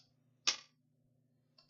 Dropping a paperclip on a desk (wood)
drop, fall, paperclip, stationary